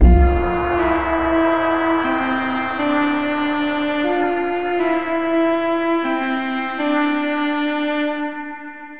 SemiQ intro 18
This sound is part of a mini pack sounds could be used for intros outros for you tube videos and other projects.
sound, soundeffect, fx, effect, sfx, sound-effect, sci-fi, delay, deep, soundscape, sound-design, pad, experimental